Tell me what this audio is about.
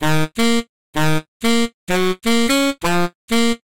Calabria Saxophone Melody Loop - No Reverb
Honestly, I am impressed with myself!! I remade the loop from Calabria 2008 by Enur (covered by Strange/Dance Fruits, Nathan Dawe, etc.) with ReFX Nexus 4.5 from a preset in the Deep House expansion called "Piano and Sax." The sample is set at 128 BPM (a nice tempo 😉). I made it in FL Studio and added some distortion, because the original patch didn't have enough power.
This version does not have reverb.
dance, edm, house, loop, multiphonics, sax, saxophone, soprano-sax, soprano-saxophone